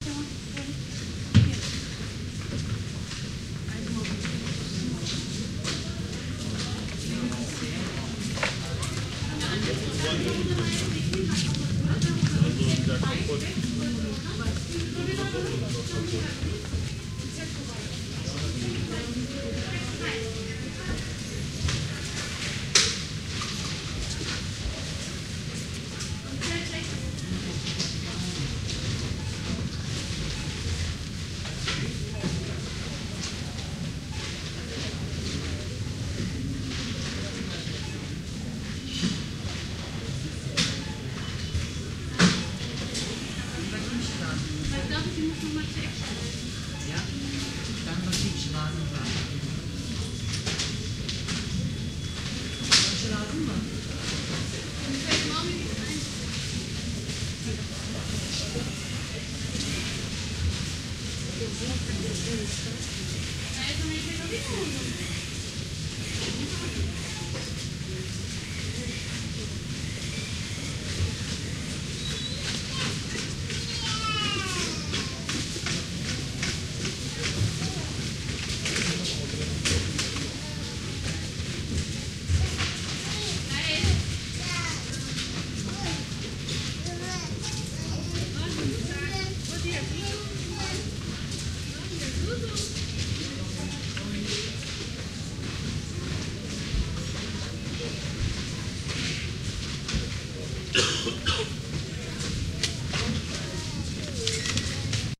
Supermarket-01 (stereo)
the sound of a supermarket
babel, checkout, supermarket, cashout, counter, indoor, stereo, voices, german